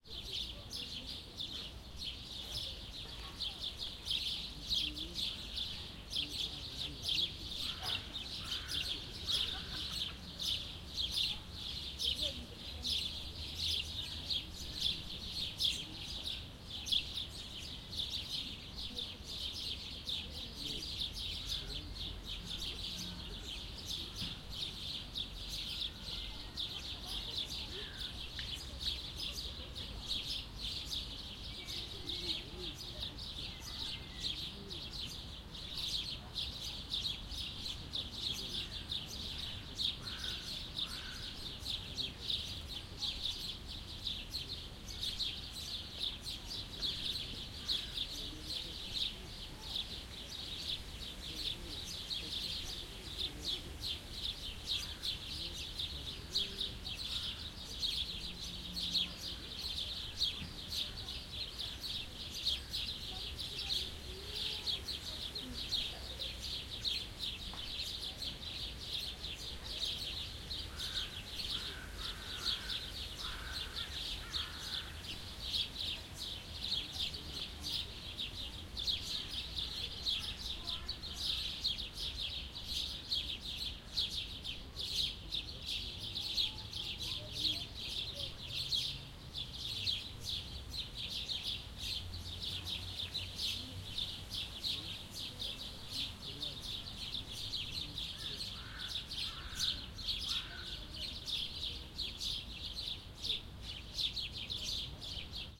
Birds in park
soundscape, birds, field, recording, park, ambient, people, distant